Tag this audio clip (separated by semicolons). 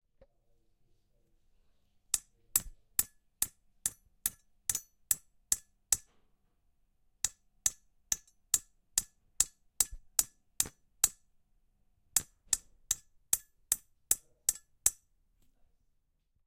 breakers; down; hammer; nails; scaffolding